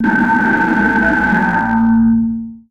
Some Djembe samples distorted
dark, distorted, distortion, drone, experimental, noise, perc, sfx